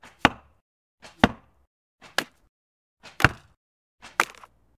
Melon Impacts 3
Shooting a melon with a Longbow.
Archery, arrow, arrows, bow, melon, shoot